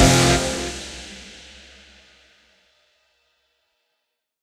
guitar and drums (1/8) 90bpm Fsus